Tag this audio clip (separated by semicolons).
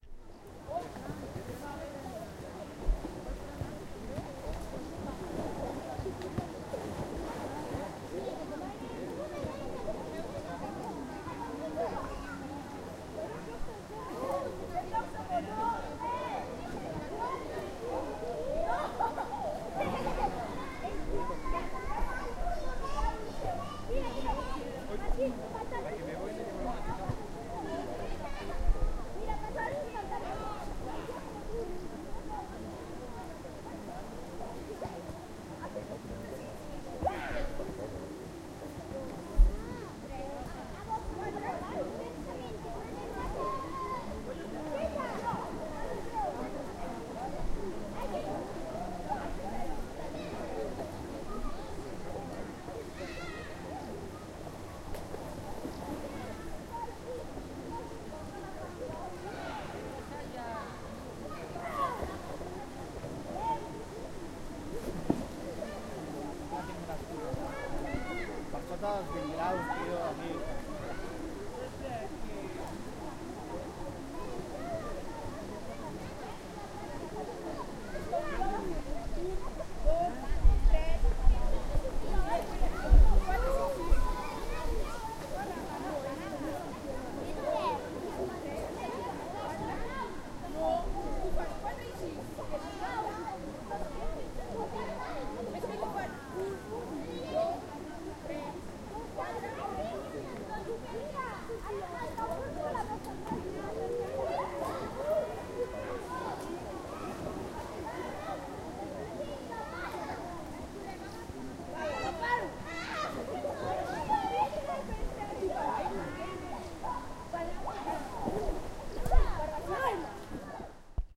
ambiance; ambient; bag; binaural; catalonia; children; field-recording; ohm-II; pool; relax; soundman; summer; swimming; zoom